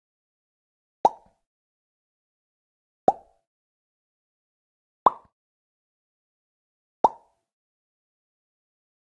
Just pop sound make with the mouth